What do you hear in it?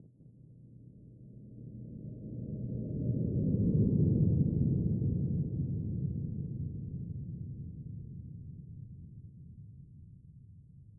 Slow Flyby Landing
Sound of a large object moving by or landing slowly, such as a spaceship.